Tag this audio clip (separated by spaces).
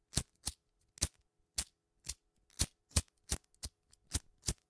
clipper candle lighter ignite smoke disposable ignition light match fire lighting burn tobacco burning zippo matchbox cigarette flame gas spark collection strike foley smoking